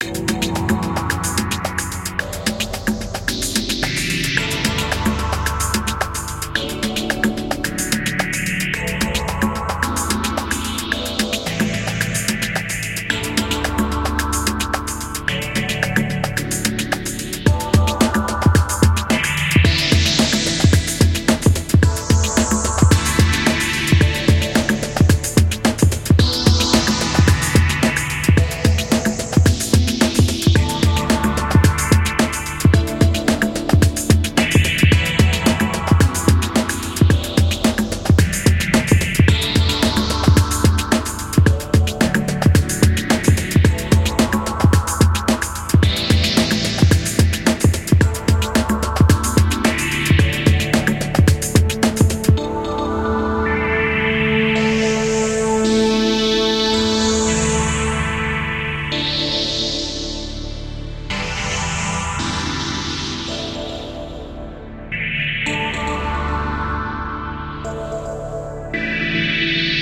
Meet The Fish (loop)
A short rhytmic loop made for a little unreleased demo game :)
fish, free, game, loop, meet, music, rhytmic, soundtrack